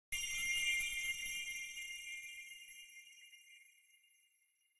A magical glimmer noise generated with a synth, Gladiator VST
airy, chimes, ethereal, fairy, glimmer, magic, magical, sparkle, spell